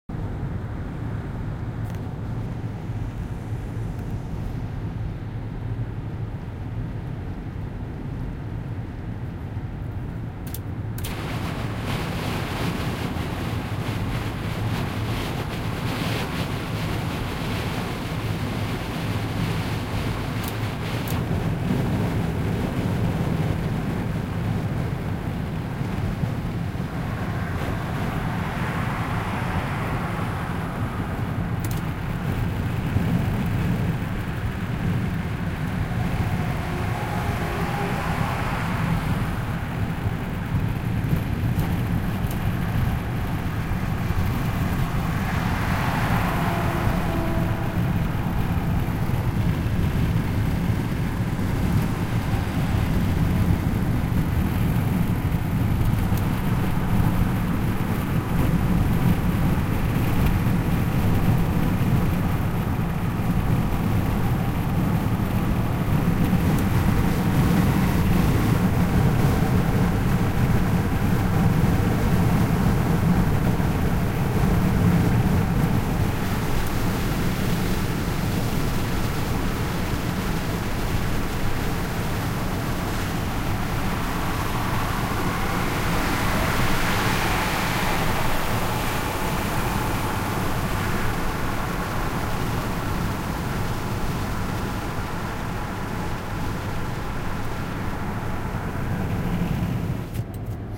Car window
Several levels of opened window of a car